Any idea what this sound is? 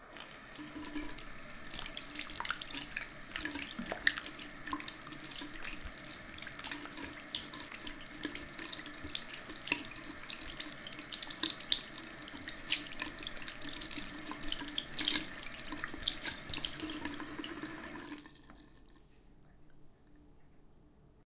Washing hands in a sink. Recorded with AT4021 mics into a modified Marantz PMD661.
bathroom, foley, hands, liquid, sink, soap, water